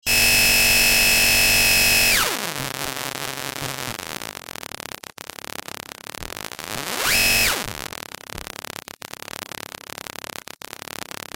Engine sound from circuit bent toy
circuit, bending, circuitbending